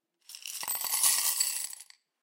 COINS IN A GLASS 24
Icelandic kronas being dropped into a glass